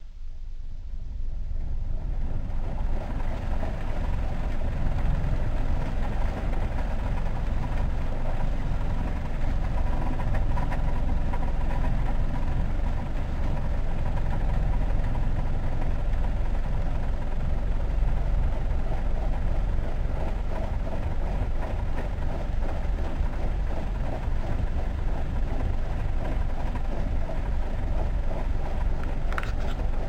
inside vehicle noise
Recorded the sound of the work vehicle. It's a bit bruised and battered, but I thought it may be interesting.
ambience, noise, vehicle